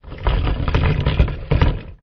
Hitting Stairs Suitcase 03
Short version of dragging the suitcase. Can be used for animation, movie or anything related to suitcases or dragging stuff.
Thank you for the effort.